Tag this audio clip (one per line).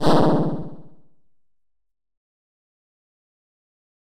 video
retro
game
video-game